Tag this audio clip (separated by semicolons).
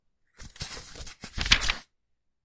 book; newspaper; paper; pages; flipping; woosh; read; books; turning; page; flick; reading; flip; library; turn; whoosh; swoosh